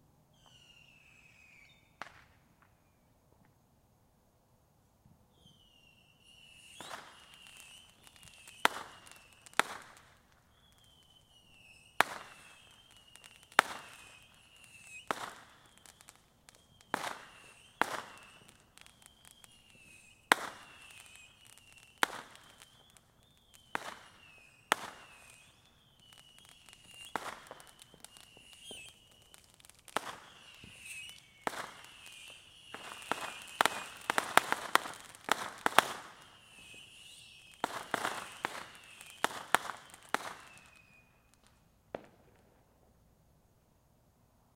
Fireworks recorded with laptop and USB microphone. Another battery of bottle rockets with whistle this time.